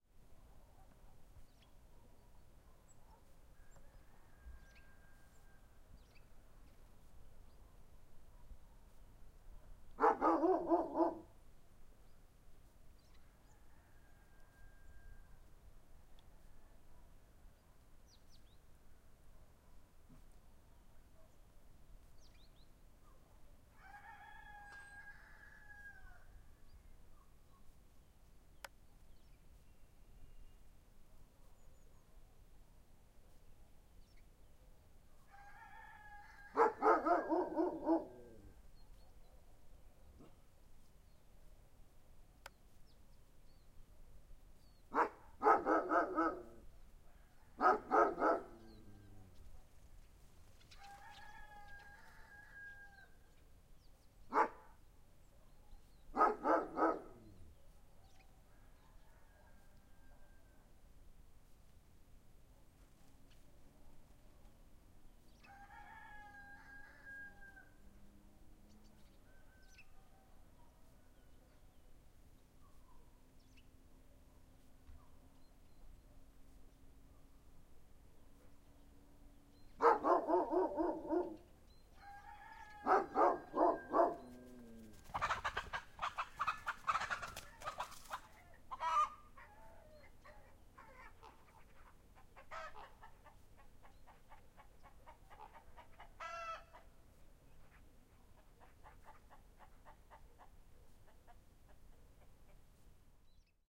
Ext, dog+curica
The sound dogs and chicken on the background of the village.
chicken, dog, village